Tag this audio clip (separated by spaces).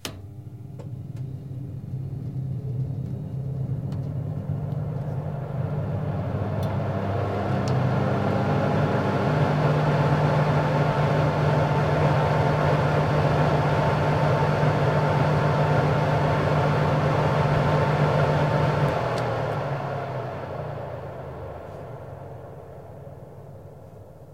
cooking domestic-sounds Oven kitchen